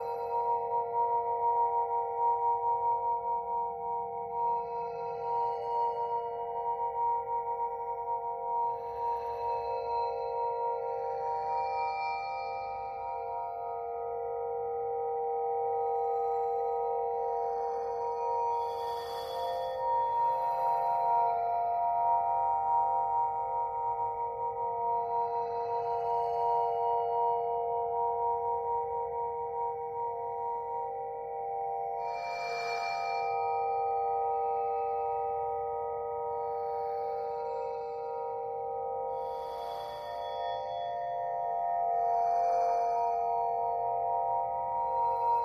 garden chimes 2 stretched pad
Luscious evolving tones derived from
"garden chimes 2" (by monterey2000), run through Metasynth's spectral synth with slow attacks. (Check monterey2000's current license on "garden chimes 2" if you use this sound).